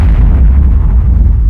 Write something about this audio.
Canon sound 01
sound, canon